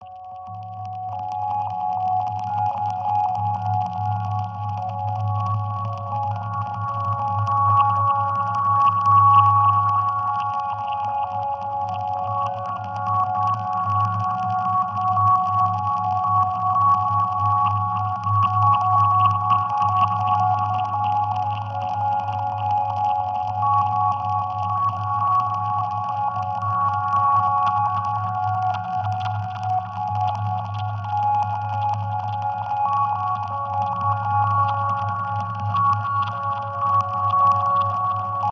One in a series of strange ambient drones and glitches that once upon a time was a Rhodes piano.